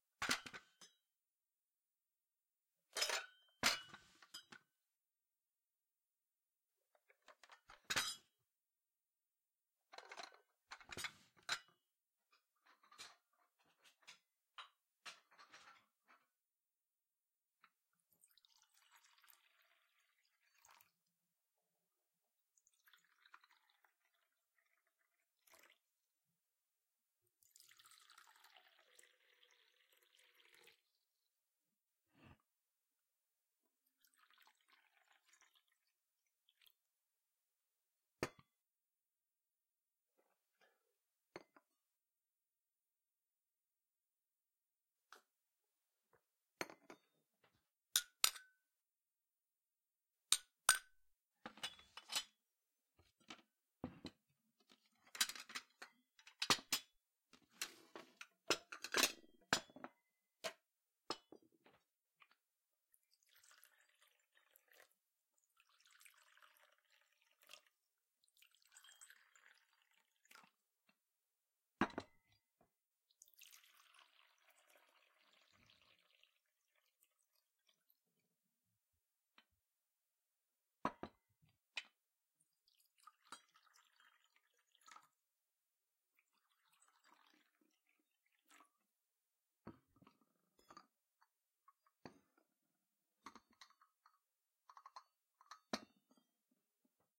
Serving coffee or tea - Bluebird 104-111
Putting cups down. Pouring into cups. At 0:40, taking a sip. At 0:48, chinking cups together to go ‘cheers’.
Original stereo Foley recorded summer 2021 in London and Essex, UK for Bluebird using paired Rode NTG-2 microphones.
Bluebird is a new, original, full-cast mystery/thriller audio drama series. A writer and his student probe the curious history of a remote English village: a burglar shot dead; an unaccountable tragedy on the train tracks; and the remnants of Cold War psychological experiments.
chinking, coffee, cups, pouring, serving, sip, tea